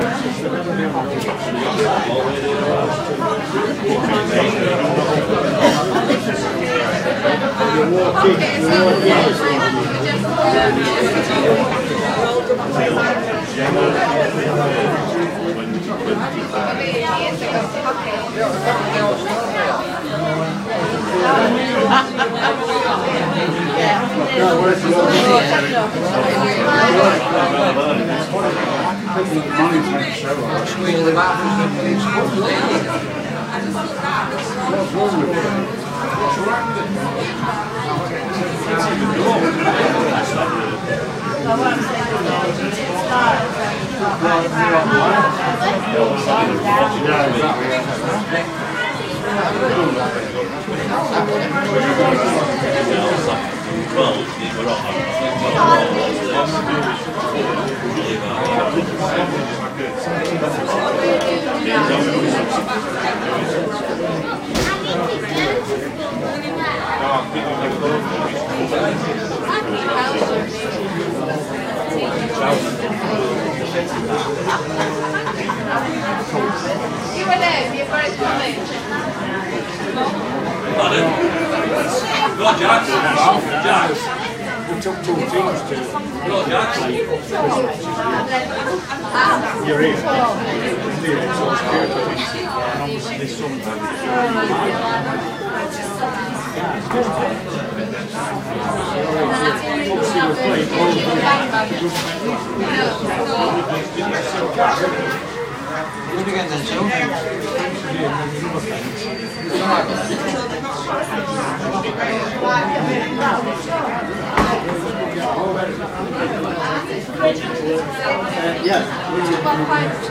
pub ambience 1
Short recording of a British pub: 'The Gate' in Swinton, South Yorkshire.